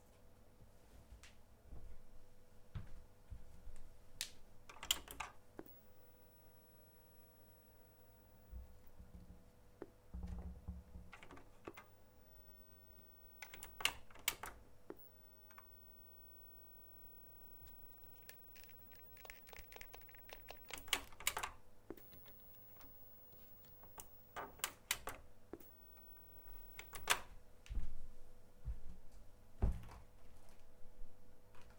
Broken TV - Track 1(14)
Some noises from my broken TV set.